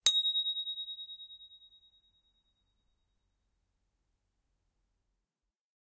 Raw audio of a metal, candle damper being struck with a metal mallet. Recorded simultaneously with a Zoom H1 and Zoom H4n Pro in order to compare the quality. The recorder was about 50cm away from the bell.
An example of how you might credit is by putting this in the description/credits:
The sound was recorded using a "H4n Pro Zoom recorder" on 6th November 2017.